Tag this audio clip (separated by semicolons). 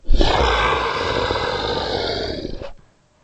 beast; monster; roar; vocalization